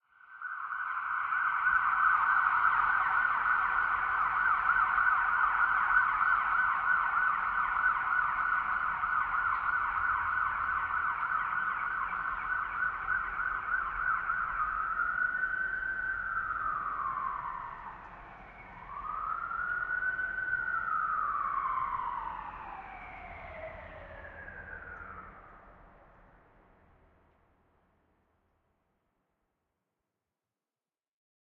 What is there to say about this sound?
Siren distant comes to a stop city Saint John 191003
ambulance, distant, police, siren